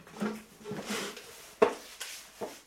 asseoir chaise2
sitting down on a wood chair which squeak